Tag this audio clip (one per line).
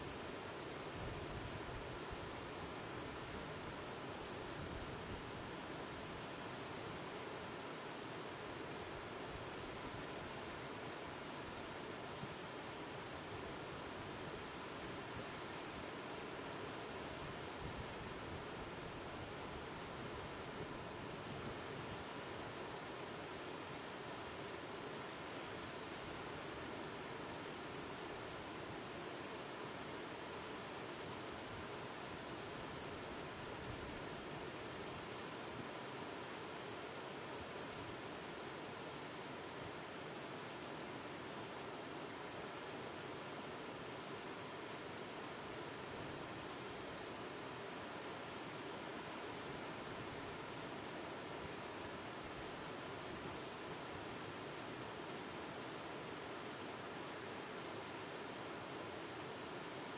river
water
waterfall